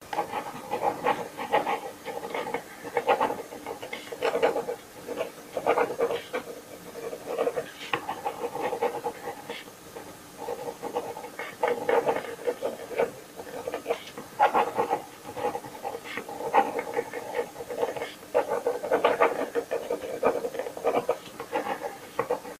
Pen writing on paper 2
Pen writing on paper, version 2. Recorded with Jiayu G4 for my film school projects. Location - Russia.
paper, pen, writing